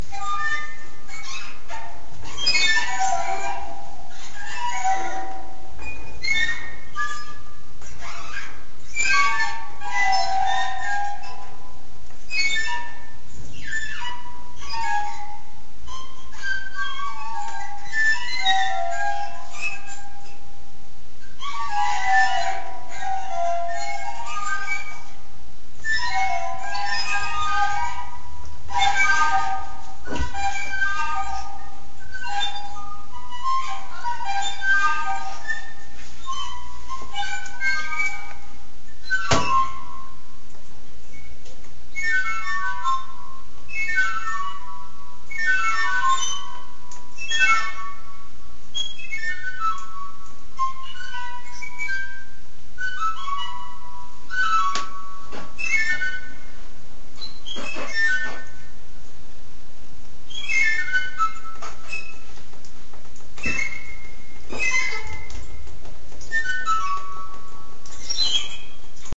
This is the second of two improvisations created by recording the strange sounds caused by the reverberation of the bird cage bars while cleaning it.